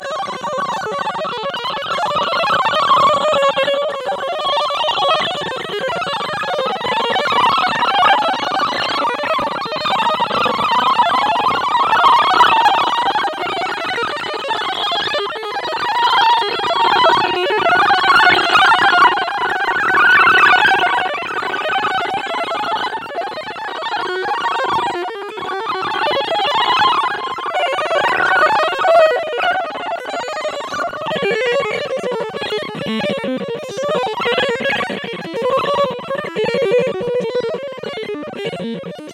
Computer hysterics
This is how a computer from the 70’s would probably sound while losing its mind. Sample generated via computer synthesis.